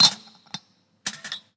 21e. stacking cups onto the machine
stacking cappuccino and espresso cups onto an espresso machine
bar, coffee, espresso, field-recording, machine